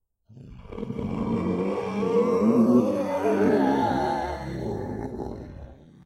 Creature Moan
A nasty horror moan. Perfect for any manner of ghost, ghoul, monster, or demon.
evil, haunted, undead, phantom, ghost, spooky, devil, demon, creepy, ghostly, moan, horror, fear, scary, sinister